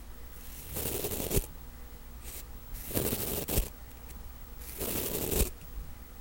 Close up of scraping the bristles on a tooth brush recorded with laptop and USB microphone in the bathroom.